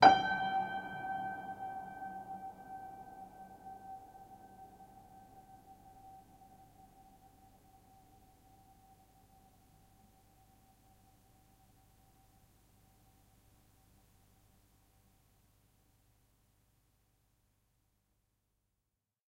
Recording of a Gerard-Adam piano, which hasn't been tuned in at least 50 years! The sustained sound is very nice though to use in layered compositions and especially when played for example partly or backwards.Also very nice to build your own detuned piano sampler. NOTICE that for example Gis means G-sharp also kwown as G#.
piano, sustain, pedal, old, horror, string